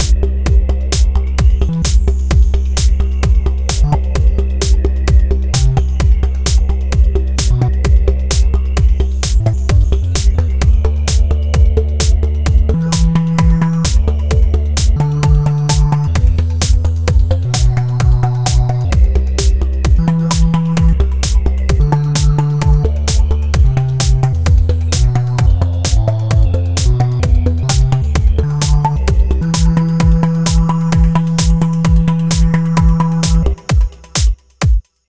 SQ never satisfied Music